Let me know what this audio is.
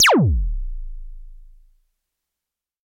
electro harmonix crash drum